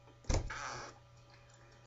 windows startup
This sound is part of my windows sounds pack. Most sounds are metaphors for the events on the screen, for example a new mail is announced by the sound of pulling a letter out of an envelope. All sounds recorded with my laptop mic.
metaphor, startup, windows, vista, recorded, xp